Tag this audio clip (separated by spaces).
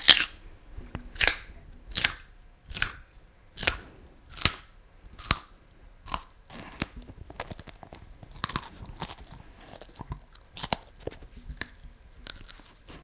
carrot crunchy eating food vegetables